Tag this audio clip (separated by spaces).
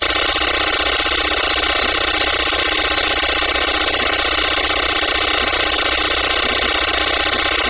retro data signal old processing